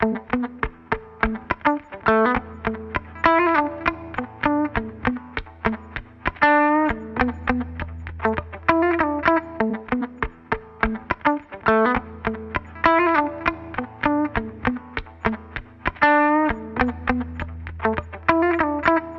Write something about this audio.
funk D punteado 100bpm
funk, guitar, rhythm-guitar